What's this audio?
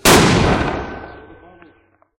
M224 Mortar Firing Close 03
Recorded roughly 15 feet from the source.
explosion, indirect, M224, military, mortar, report, war, weapon